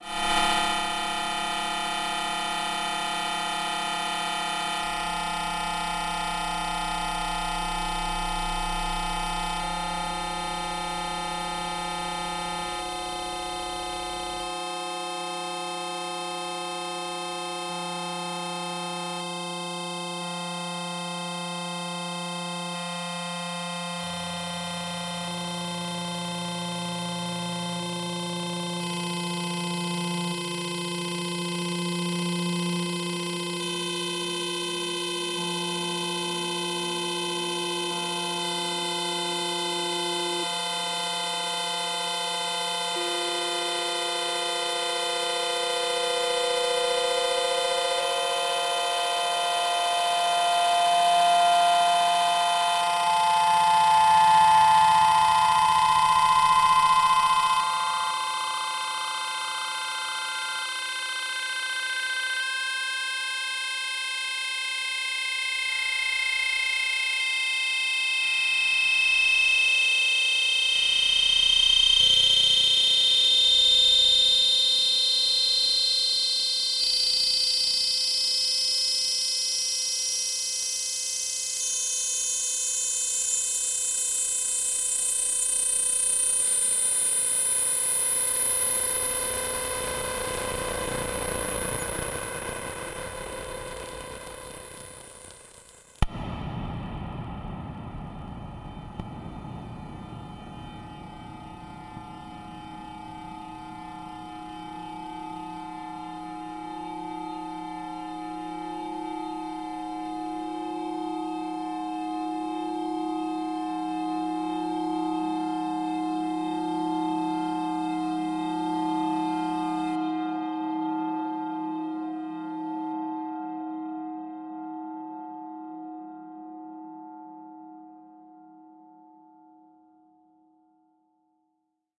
VIRAL FX 01 - C5 - SAW FILTER SWEEP plus REVERB BURST
Created with RGC Z3TA+ VSTi within Cubase 5. Noisy effect with very slow filter sweep followed by a heavily reverb noise burst. The name of the key played on the keyboard is going from C1 till C6 and is in the name of the file.